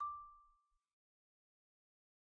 Sample Information:
Instrument: Marimba
Technique: Hit (Standard Mallets)
Dynamic: mf
Note: D6 (MIDI Note 86)
RR Nr.: 1
Mic Pos.: Main/Mids
Sampled hit of a marimba in a concert hall, using a stereo pair of Rode NT1-A's used as mid mics.
sample, orchestra, one-shot, wood, percs, idiophone, pitched-percussion, marimba, hit, organic, instrument, percussion, mallet